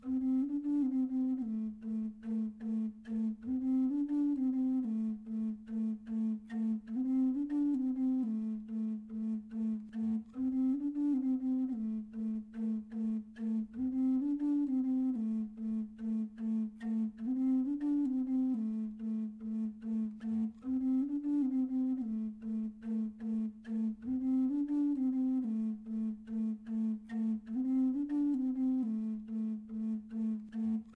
Native American Style Flute Bamboo Low A3 Riff
A simple yet familiar riff on a Low A3 Native American Bamboo Flute. I tried to make it so it would loop. This is part of project that I am working on that involves several flutes. If you use this as backing for your piece, please share it.
Bamboo, Native